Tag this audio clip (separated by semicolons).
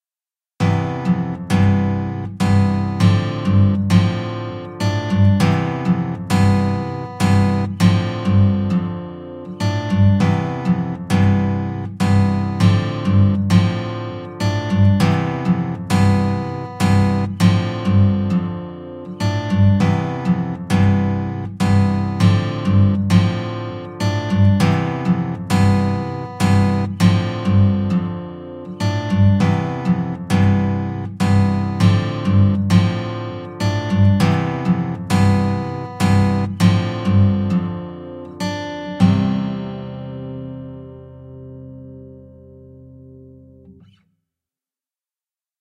acoustic; music; loop; podcast; background; piano; instrumental; pattern; stereo; jingle; trailer; guitar; sound; nylon-guitar; send; radio; movie; broadcast; interlude; instrument; melody; clean; radioplay; intro; mix; strings; chord; sample